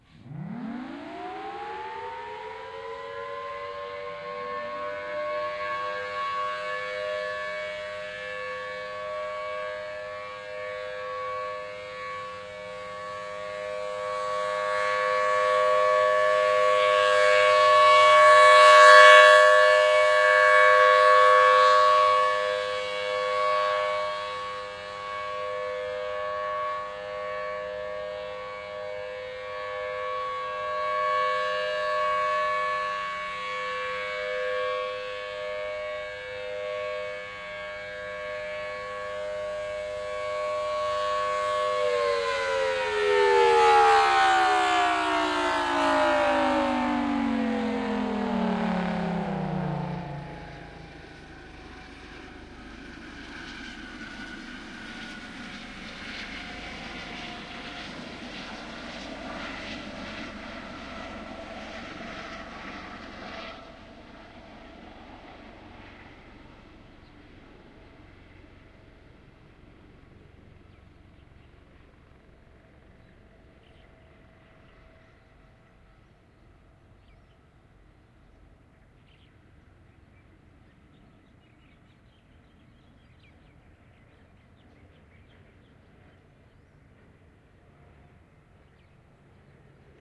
July 1st 2009 Wednesday. Thunderbolt 1000T near the entrance to the Sand Island Recreational Area doing a monthly 40 second alert test. You can also hear another Thunderbolt 1000T off in the distance fire up.